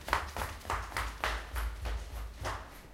steps narrow street
Person running up or down stone steps of a narrow street
footsteps, foot, bare-foot, ground, slippers